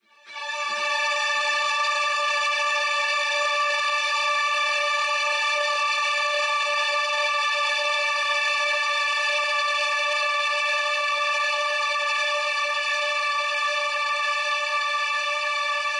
Trance String in D 4/4 120bpm
This is a heavily processed viola string section to replicate the "trance strings" effect. This sample is only a 16 second Dmaj stab.
string, strings, viola, trance, processed